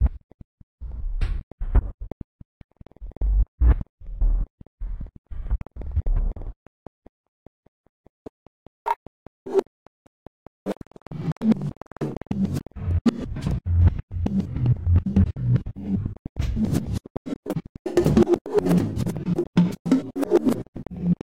granulated beat ran through rhythm machine